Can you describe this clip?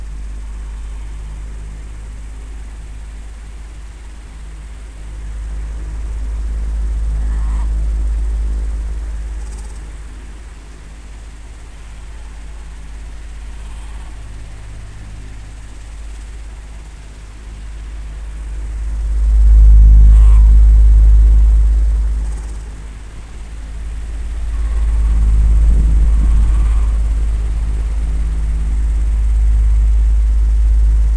squeaky auto-rotating fan
Auto-rotating creaky fan.
Recorded by Sony Xperia C5305.
fan, ventilation, creaking, low, blowing, air